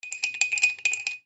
se agita una jarra con algo en su interior
jarra, agitar, mover